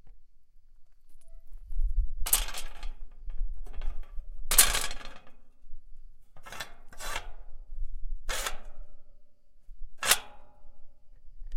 Metal door TZIA n wind...
A small metal door at the mountains of Gia, Greece.
This wrought iron gate is to keep seeps in, in order not to escape - recorded with zoom h4n